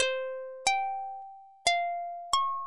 90 Partomik synth 03
standard lofi hiphop synth